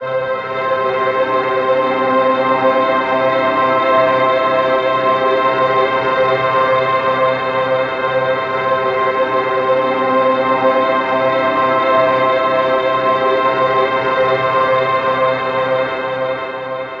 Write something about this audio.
orbit strings
A layered string pad with a dark feel